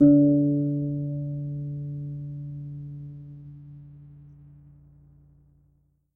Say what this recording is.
my mini guitar aria pepe
string, notes, guitar, nylon